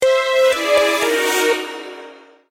game-lose
This is a small progression for when a player loses a game. Created in GarageBand and edited in Audacity.
digital, synthesized, electronic, notification